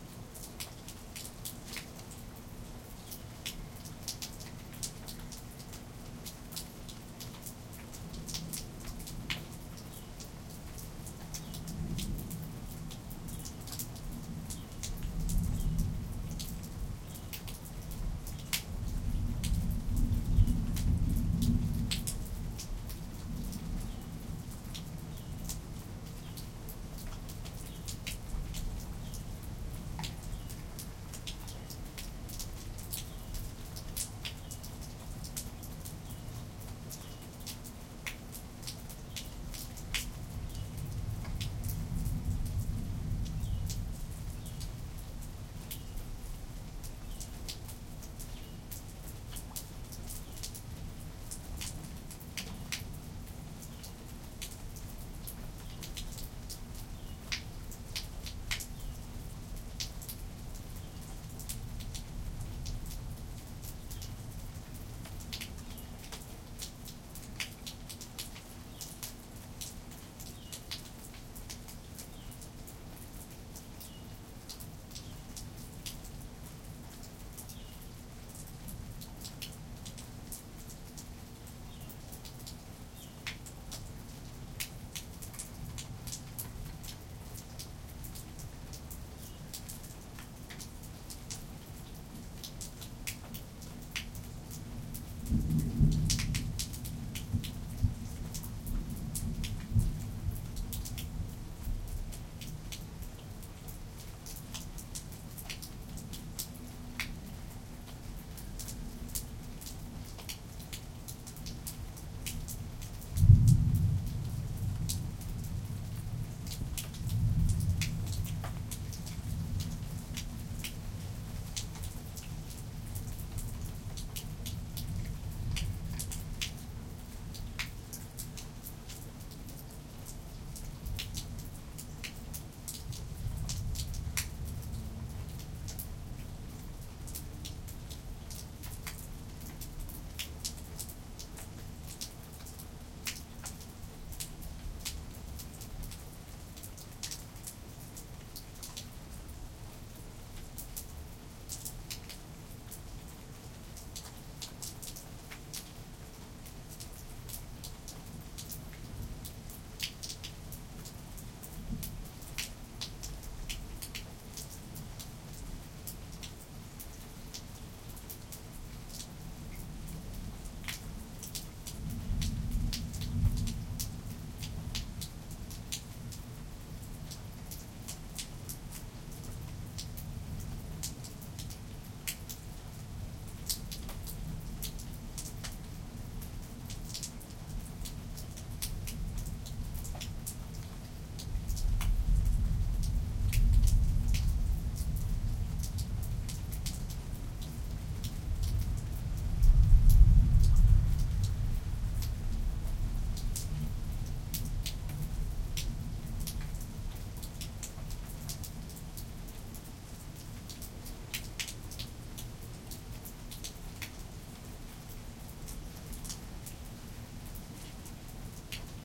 thunderstorm loop
Thunder from a storm centered about a mile from my location. Rolling thunder throughout, a cardinal is the songbird in first portion, steady rain, use as a meditation or environment loop is perfect. Recorded on a Zoom digital recorder.